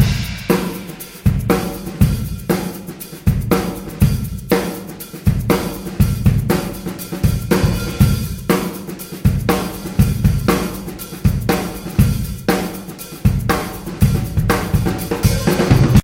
live groove big room drums
8 Bars of big live room drums with a groove shaker.
8-bars, big-room, drums, funky-drummer, groove